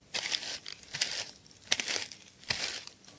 mco walk e02
Footsteps...
NOTE:
These are no field recordings but HANDMADE walking sounds in different speeds and manners intended for game creation. Most of them you can loop. They are recorded as dry as possible so you should add the ambience you like.
HOW TO MAKE THESE:
1. First empty two bottles of the famous spanish brandy Lepanto.
2. Keep the korks - they have a very special sound different from the korks of wine bottles.
3. Then, if you're still able to hit (maybe you shouldn't drink the brandy alone and at once), fill things in a flat bowl or a plate - f. e. pepper grains or salt.
4. Step the korks in the bowl and record it. You may also - as I did - step the korks on other things like a ventilator.
5. Compress the sounds hard but limit them to -4 db (as they sound not naturally if they are to loud).
floor, walks